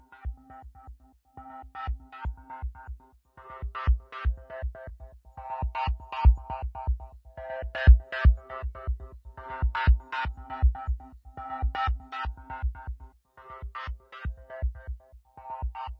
bf-fuckinaround
Took a pad from a friend, threw it through Fruity Loops's "Love Philter" and added distortion.